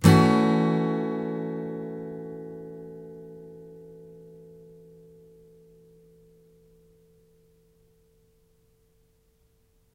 chord Gm7
Yamaha acoustic through USB microphone to laptop. Chords strummed with a metal pick. File name indicates chord.
chord, guitar, strummed, acoustic